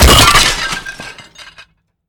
Can be used for something destroying a room, a wall, a roof...
Made for a short film: